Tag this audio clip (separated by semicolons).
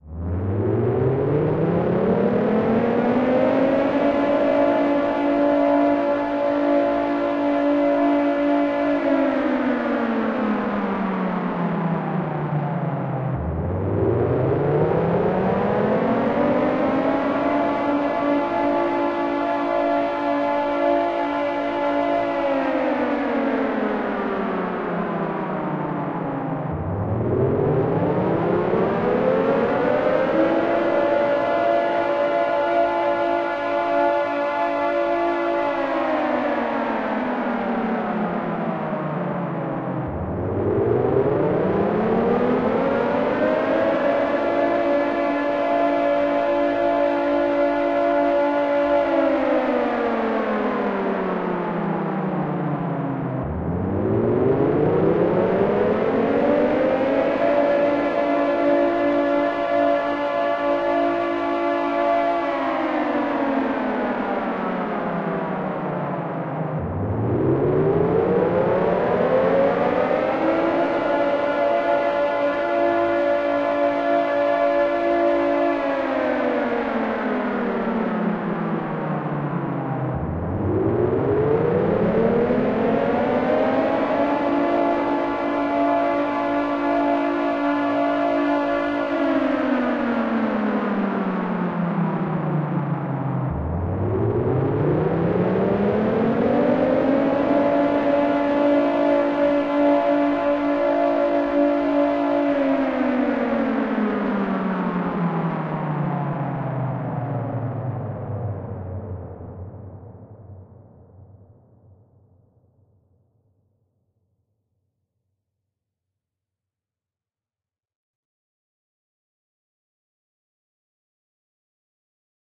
Air; alarm; Army; Bomb; design; Distance; Effect; FX; Game; High; Movie; Quality; Raid; sfx; Siren; sound; War; WW2